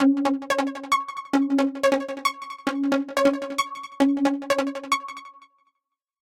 These are 175 bpm synth layers maybe background music they will fit nice in a drum and bass track or as leads etc